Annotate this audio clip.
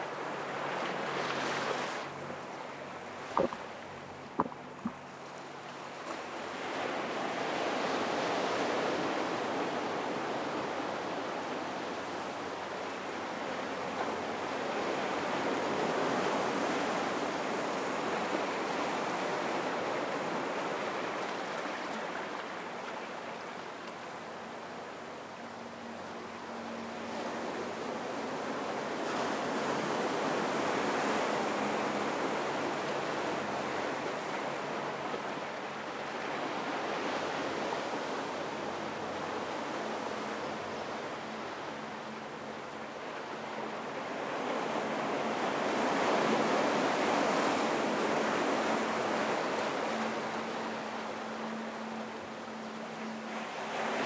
Ocean waves washing up on a Rocky California beach.